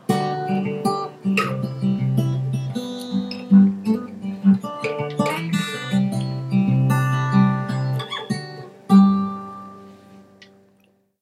It's a guitar recording.
I changed the pitch to E low, the frequency is 79.8 Hz with audacity.
There is an effect of fade out
recording, guitar